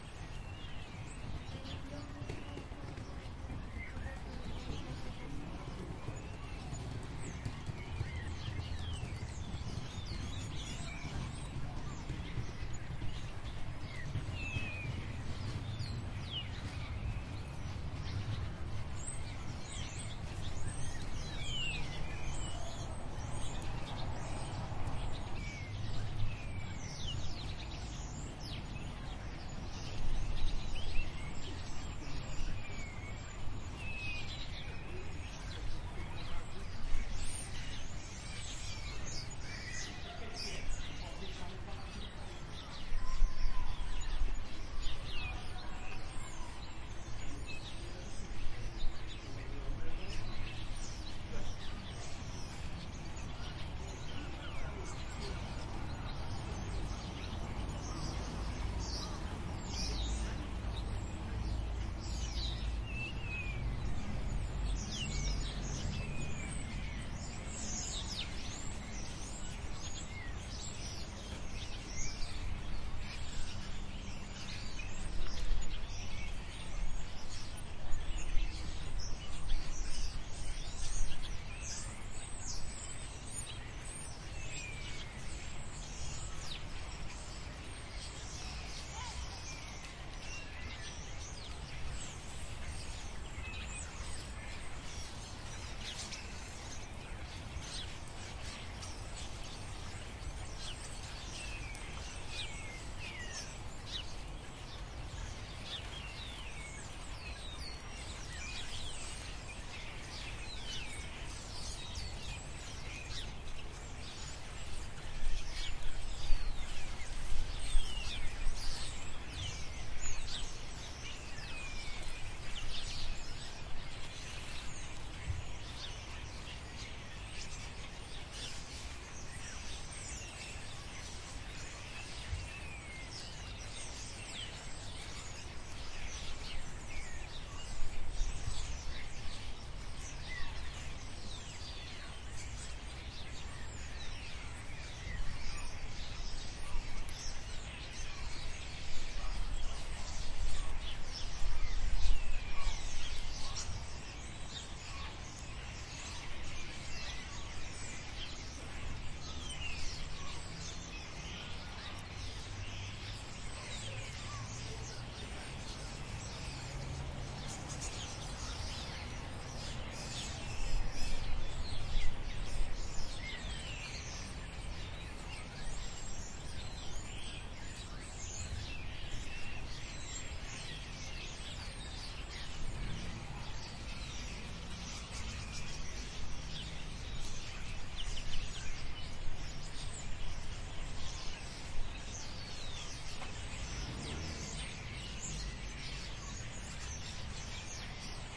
birds feeding palmtree STE-008
birds feeding in a palm tree around 6pm in porto
field-recoding, palm-tree